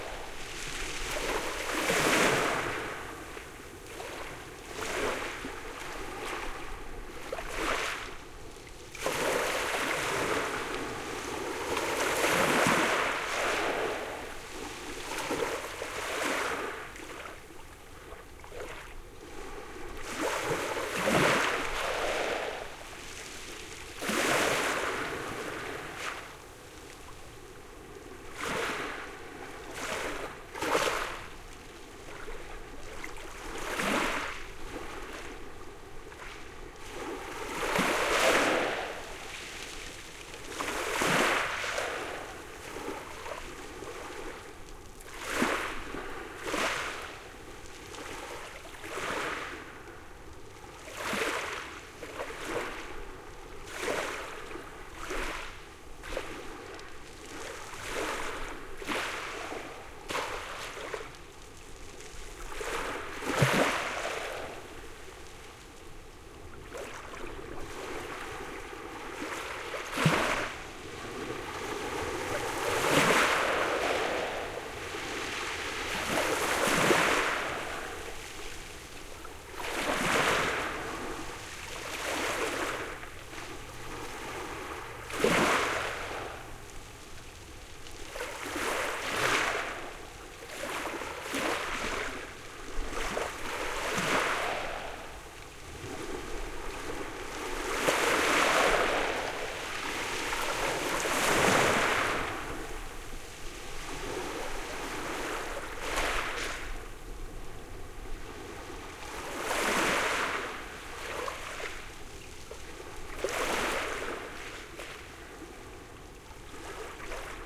Closer take of soft waves splashing on the pebbles of a beach in Gaspé Bay, Quebec Canada. For a take still closer please listen to 20080808.wawes.pebbles.closeup. Recorded with two Shure WL183 capsules into a Fel preamp and Edirol R09 recorder.
beach, field-recording, nature, splashing, water, wave